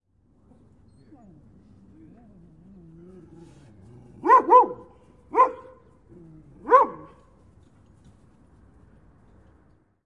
Dog Barks
Single dog low growl then sharp barks
Bark, Growl, Animal, Moan, Dog